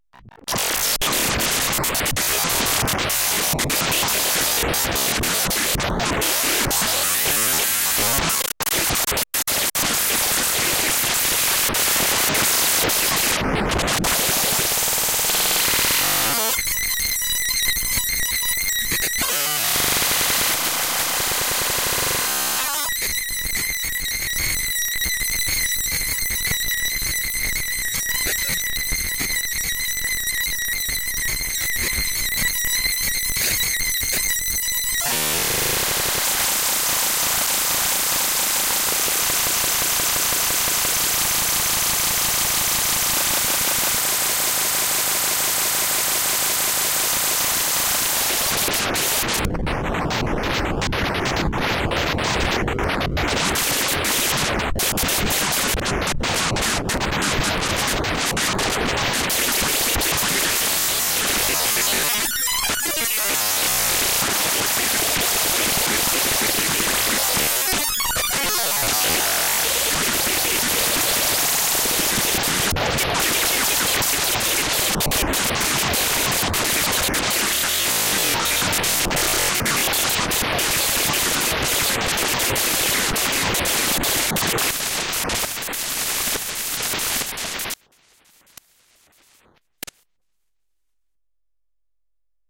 Abstract Glitch Effects 015
Abstract Glitch Effects / Made with Audacity and FL Studio 11